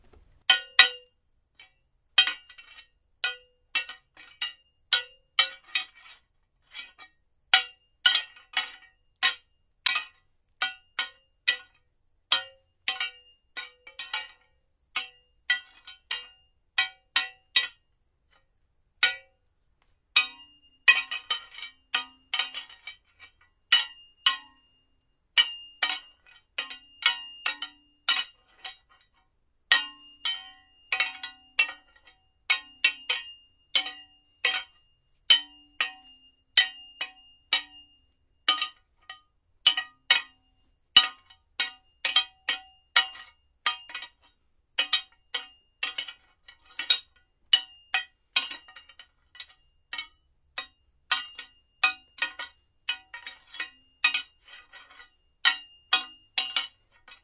big crowbar vs little crowbar
A big crowbar hitting a little crowbar